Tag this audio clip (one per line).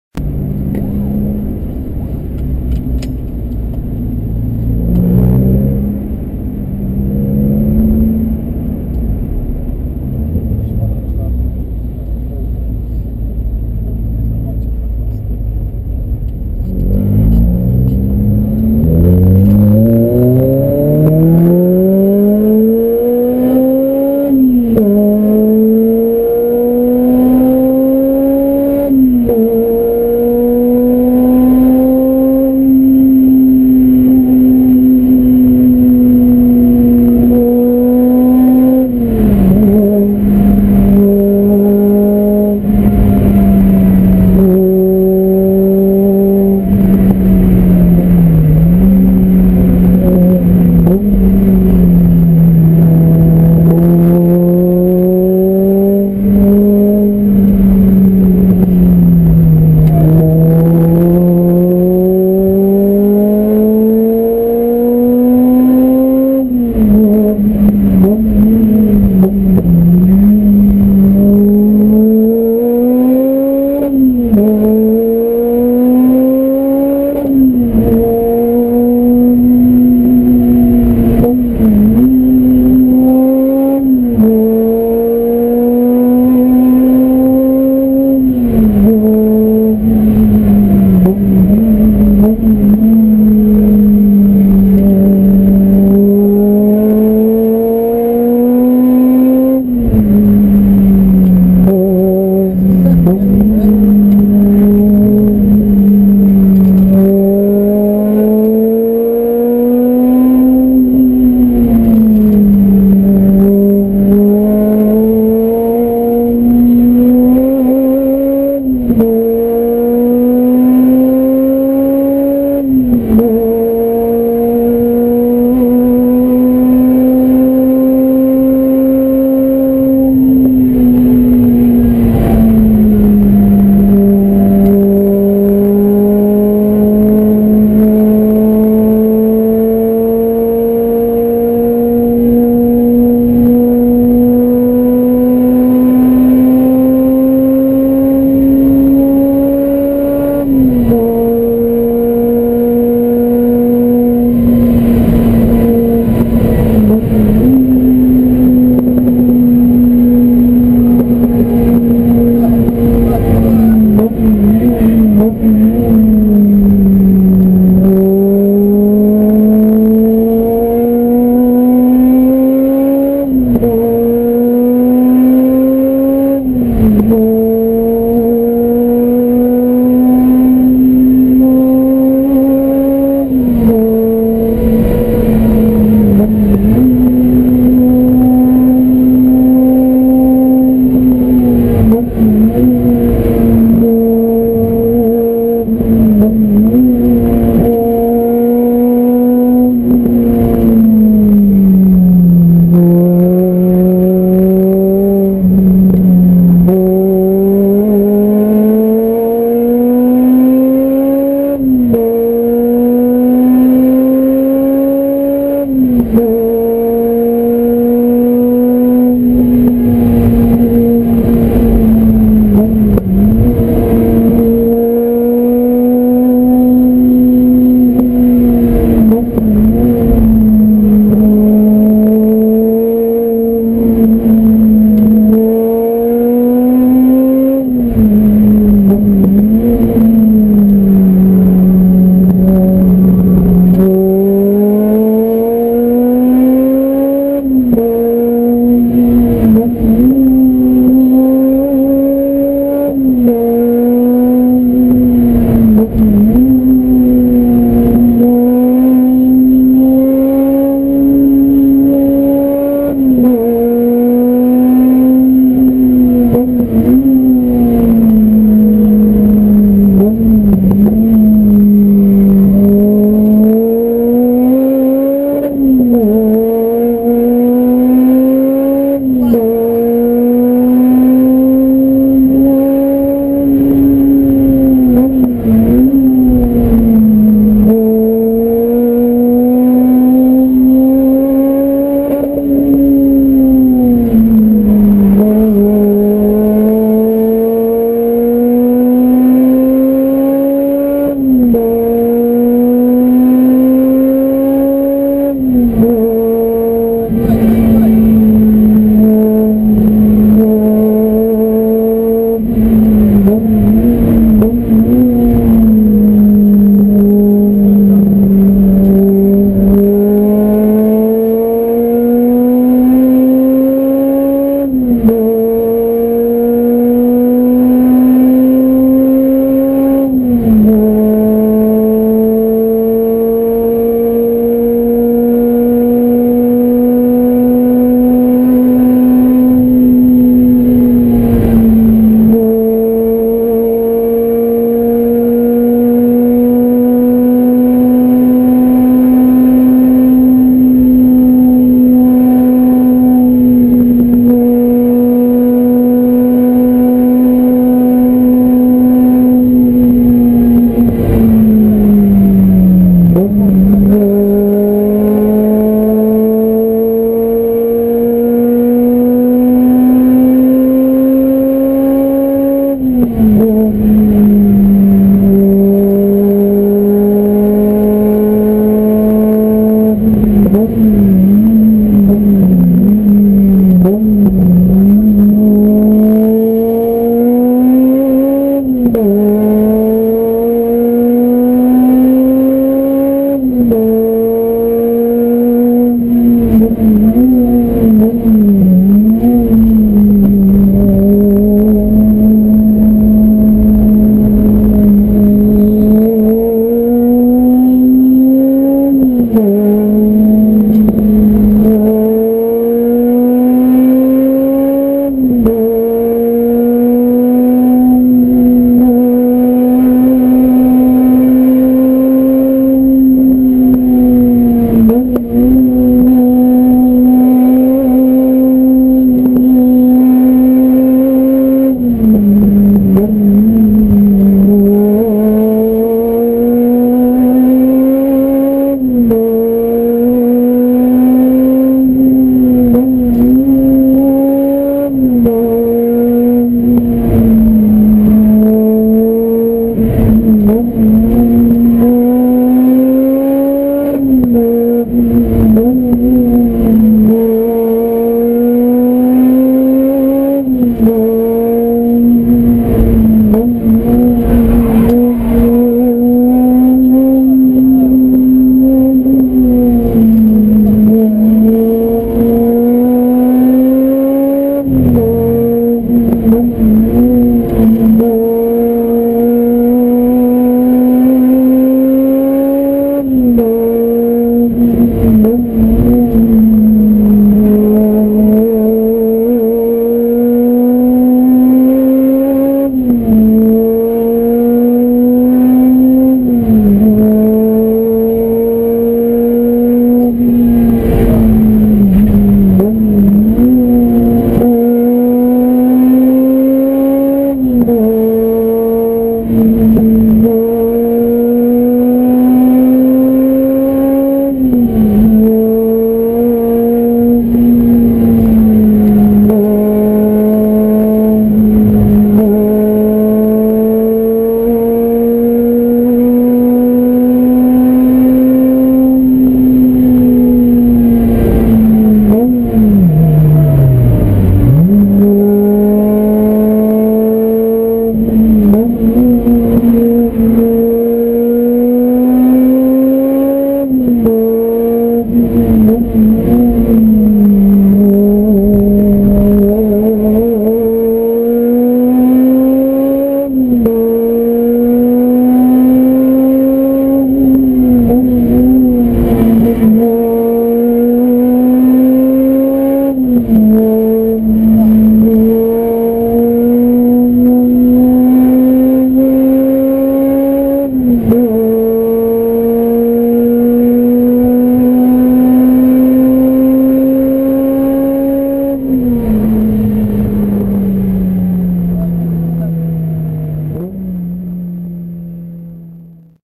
car
drive
driving
engine
gears
motor
race
race-car
racing
shifting